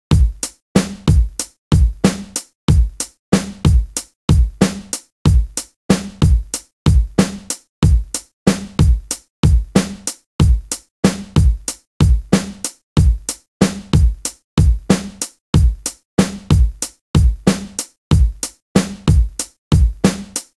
Drum loop made in LMMS. 140 BPM. Sounds kinda like "This is How We Do It" lol. Do whatever you like with it and make it amazing!